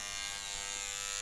Front door buzzer
Opening the front door using a buzzer.